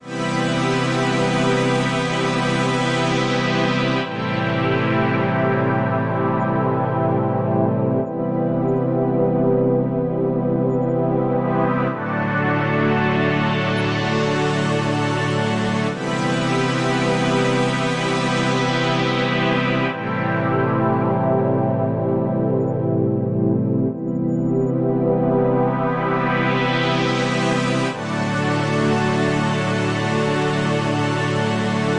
Orchestra Strings Pad 2 (Em - 120 BPM)
Orchestra Strings for Themes with Filter fades. Created with Fabfilter Plug-Ins. Key: Em, 120 BPM.
Film, Pads, Strings